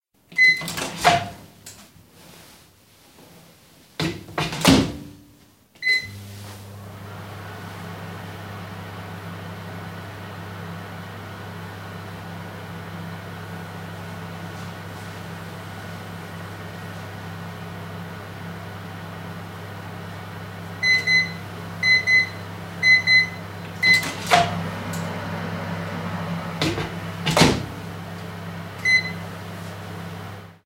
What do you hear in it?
Recording of the sound of a build-in microwave oven in a (dutch) kitchen in 2013.
Recorded with HTDZ shotgun microphone, iRig PRE xlr adapter on Samsung S4 smartphone.
house, kitchen, microwave, 2013